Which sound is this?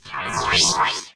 Space Beam
A beam, teleport, or generator attempt.
cyborg, droid, electronic, game, generator, robot, robotic, shooter, space, spaceship, tractor-beam